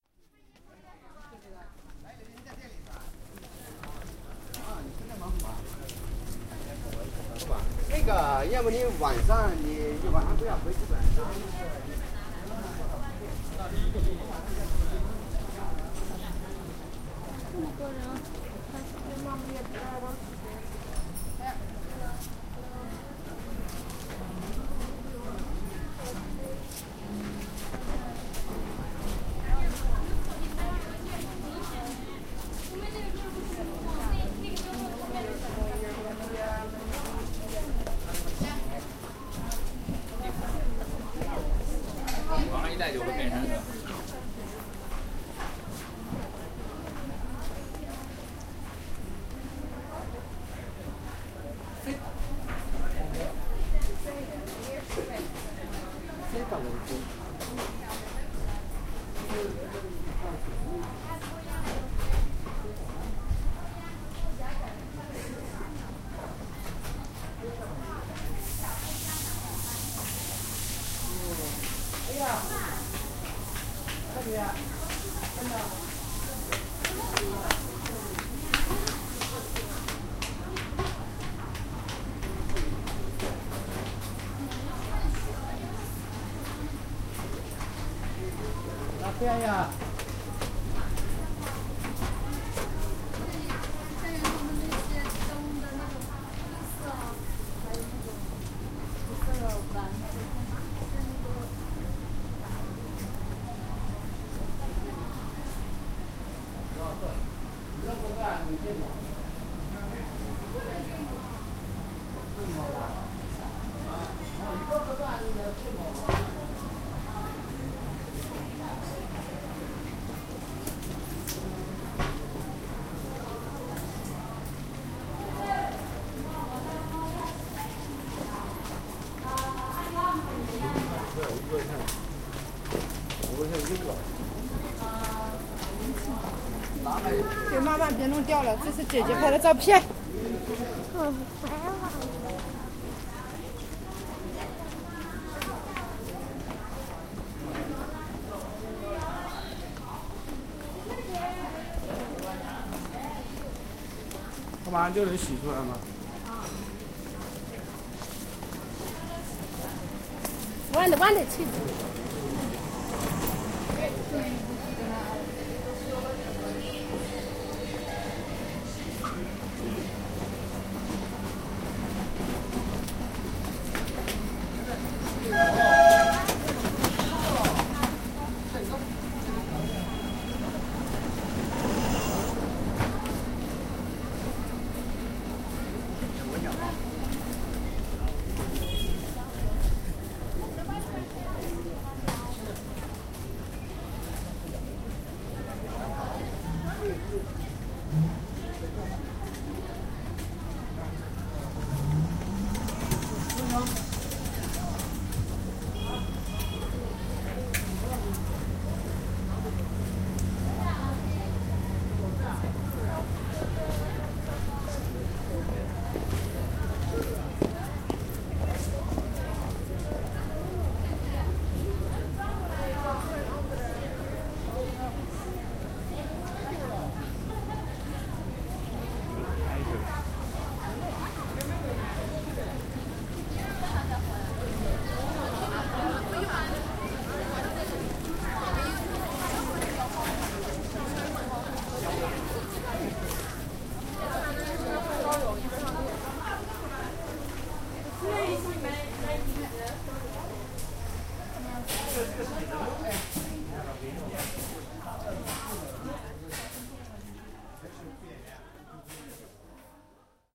Suzhou Shan Tang Old Town Street
Made on a trip to the Shan Tang Old Town Street in Suzhou.
Chinese, market, Street, Suzhou